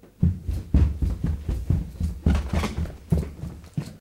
running down stairs